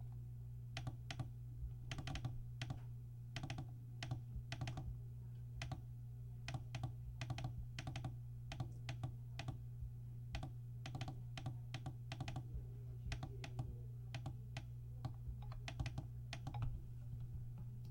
hitting a button to make it sound like morse code